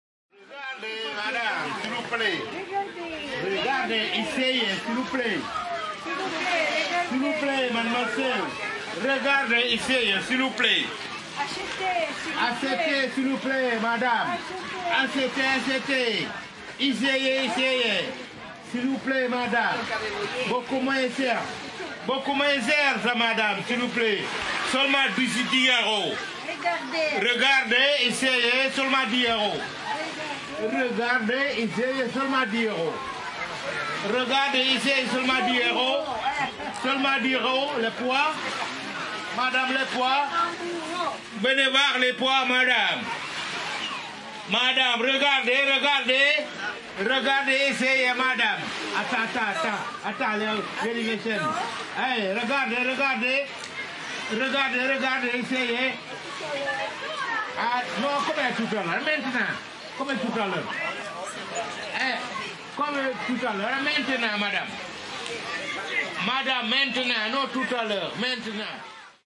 "Regardez madame!": a Senegalese street vendor near the seashore.
Cala, Italy, Juniper, Sardinia, Sea, Senegalese, vendor